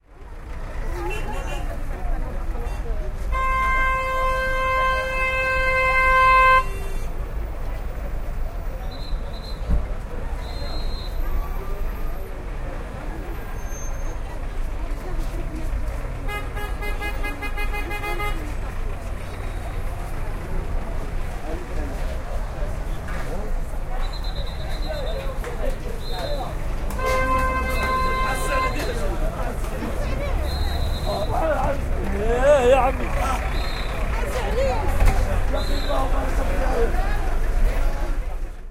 Tràfic a Tanger
This is the traffic sound in the market of Tanger. The police make sound their whistles. The conductors its klaxon. People shout. The recording has taken with a Edirol-09HR.
claxon, hard, market, policeman, tanger, traffic